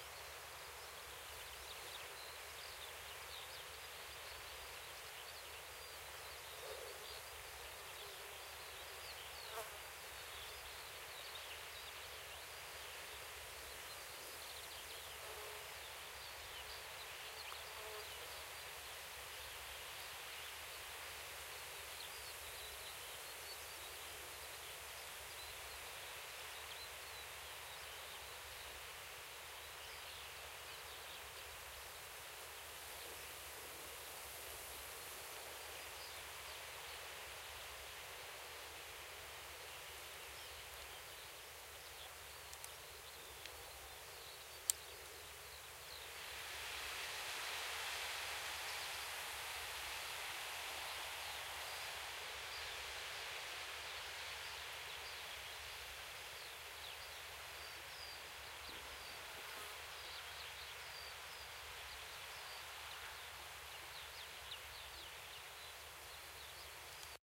Zernikow Skylarks / Lerchen
Zoom h4n in Zernikow / Oberhavelland / Brandenburg / Germany
atmosphere, Brandenburg, nature, Skylarks, Sounddevice, village